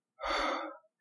Male voice exhaling
breath, human, male, vocal, voice